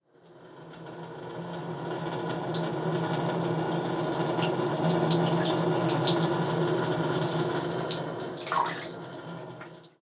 This is a 10.005 second file of A streaming water sound.
flow, liquid, splash, stream, trickle, water